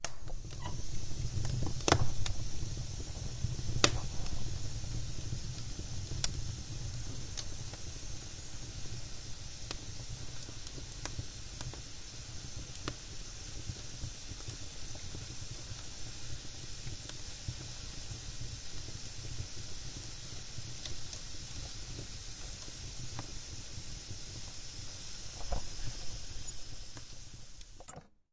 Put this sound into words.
Burning wood.
Recorded on the TASCAM DR-100 with internal UNI microphones.